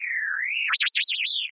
Created with coagula from original and manipulated bmp files.